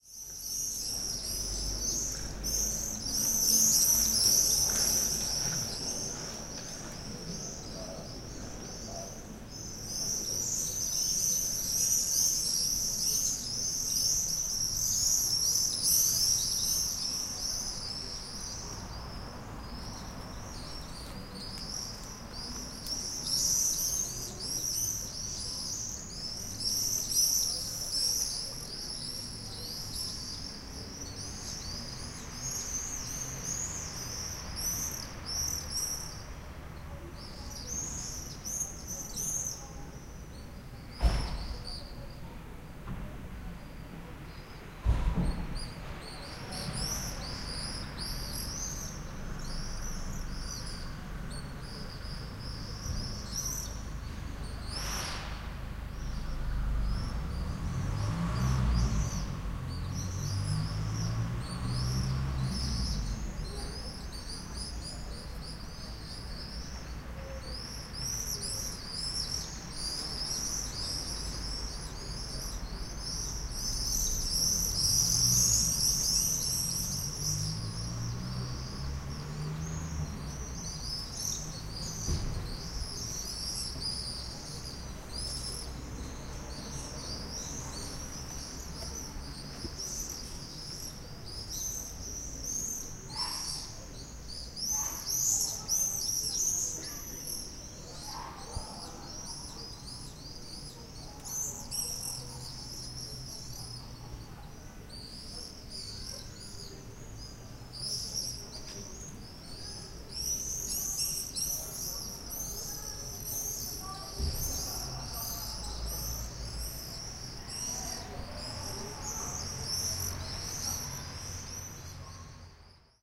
12.07.2011: about 21.30. Kossaka street in Poznan/Poland. narrow street. sounds of squealing swallows + general ambiance of the evening Kossaka street: some cars, muffled voices of people.
field-recording, birds, swallows, animals, poznan, poland-ambiance-squealing-street